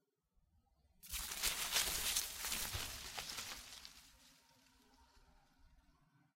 looking in bushes
Rustle of leaves. Searching in a bush for a something.
Recorded with Oktava-102 microphone and Behringer UB1202 mixer.
rustle, bush, noise, leaves, nature, foley, field-recording